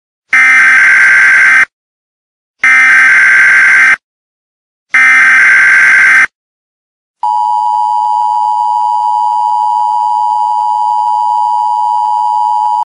EAS Alarm
A sound used in emergency broadcasts, alerts, notices and action-notifications™
air-raid, alarm, alert, beep, eas, emergency, horn, siren, sound, tornado, warning